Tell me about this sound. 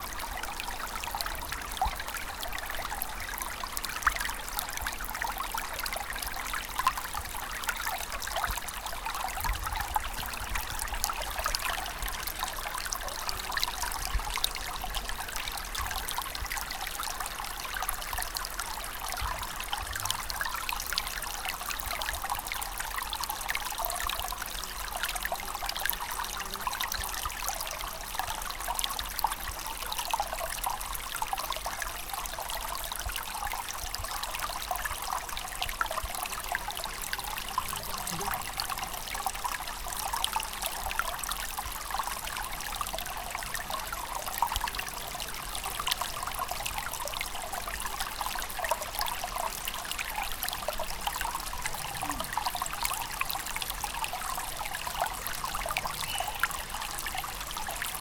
River - Running water 2
River sound recordet with Zoom H1.
nature, water, river, surround, waterfall, running, small